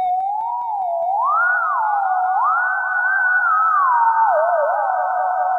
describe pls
Ping pong reverb?